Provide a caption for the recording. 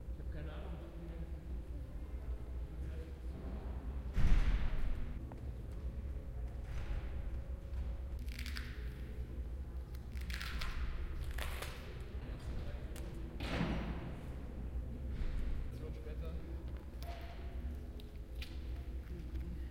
P4b HFG ambience mix 15
ZKM HFG Karlsruhe Indoor Atrium Preparing Performances Insonic2015
Reverberant sounds of working activity
noise, sounds, ZKM, industrial, indoor, working, reverberant, afternoon, HFG